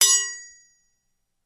zilbel 6in chk3
After searching the vastness of the interweb for some 6 inch zilbel samples with no luck, I finally decided to record my own bell. Theres 3 versions of 4 single samples each, 4 chokes, 4 medium and 4 hard hits. These sound amazing in a mix and really add a lot of life to your drum tracks, they dont sound over compressed (theyre dry recordings) and they dont over power everything else, nice crisp and clear. Ding away my friends!